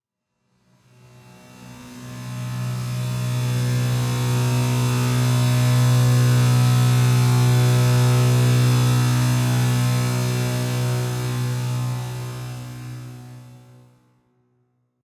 techno pad fx-02
techno pad fx atmospheres ambiens
ambiens, atmospheres, effect, fx, pad, techno